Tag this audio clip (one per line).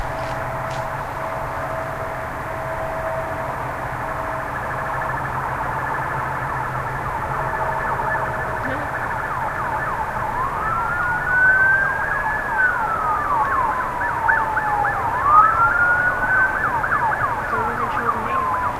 field-recording
hydrophone
siren